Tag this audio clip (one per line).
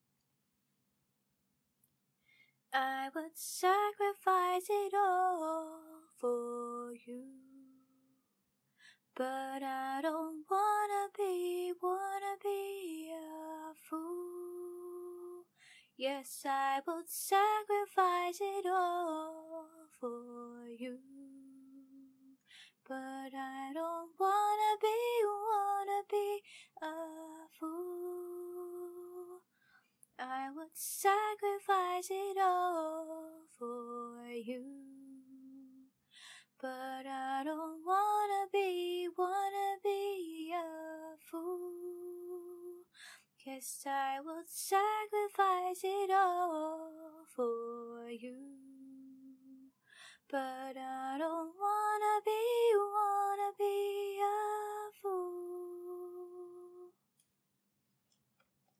female
hook
loop
song
vocal
voice
woman